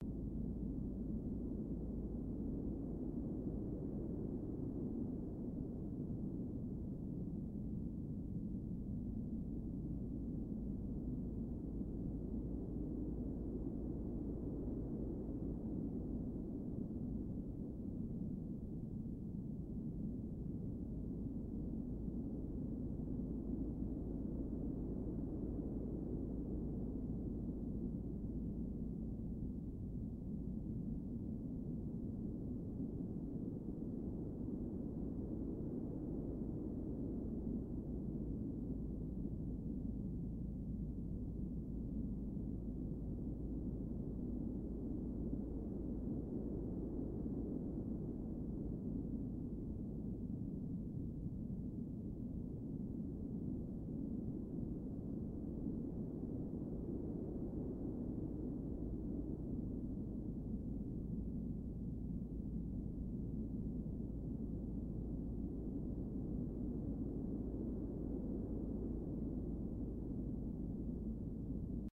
Flying over a landscape. Created by Korg Mono/Polys noise-generator. Made for an atmospheric drone shot.